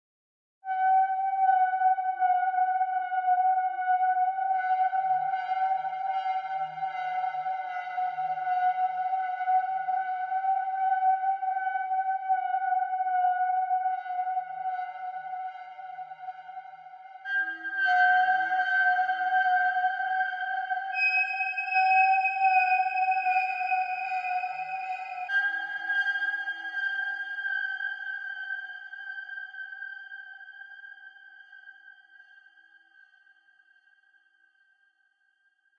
flute bell
A strange spacy sci-fi sort of sound in which a flute like sound and a bell are morphed together - part of my Strange and Sci-fi 2 pack which aims to provide sounds for use as backgrounds to music, film, animation, or even games